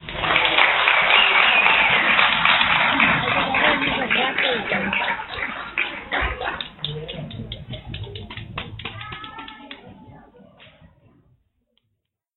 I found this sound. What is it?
An old sound I discovered cut from a video recording of a play. Audience is heard clapping, cheering, and whistling.
cheer, clapping, play, whistle, clap, audience, adults, cheering